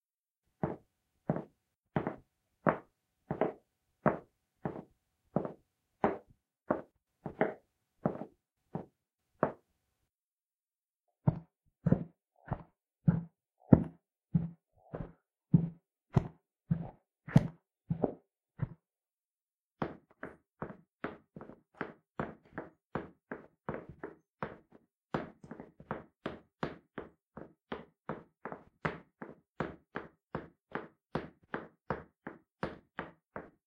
steps, no noise
Walking/slow running on two surfaces (hard and soft) recorded and processed (noise reduction, noise gate, ...) so that there is no noise.